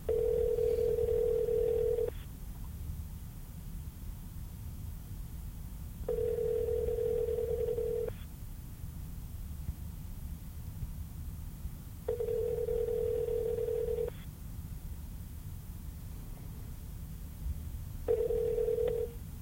cell phone tone ringback 3 times
the familiar sound you hear when you call someone on your cell phone